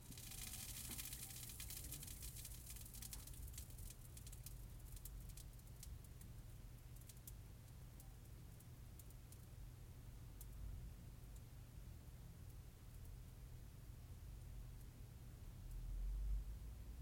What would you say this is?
TV CRT PAL TurnOff
Recorder: Fostex FR-2;
Mic: Audix SCX1-O (Omni);
Mic Position: directly above back of TV set;
This is a recording of my about 7 year old TV CRT (PAL); Immediately after it was switched off.
crt, pal, static, tv